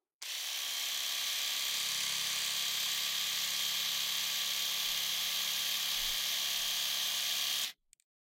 Electric razor 2 - trimmer mode
A recording of an electric razor (see title for specific type of razor).
Recorded on july 19th 2018 with a RØDE NT2-A.
razorblade, shaver, electricrazor, shaven, hygiene, shaving, beard, shave, electric, Razor